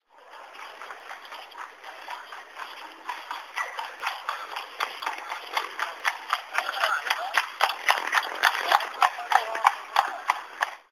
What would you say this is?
Horse trot
Mobile phone (my sony ericsson) recorded a horse drawn buggy coming to a halt at the place they wait at St Stephens Green north
live, tourism, field-recording, transport, mobile-recorded